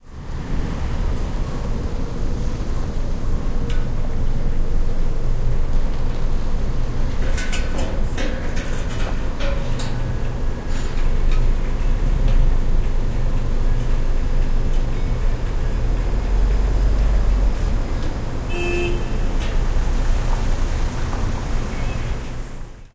0046 Construction and traffic small street
Construction, music from a shop, traffic in small street, horn.
20120116
field-recording, music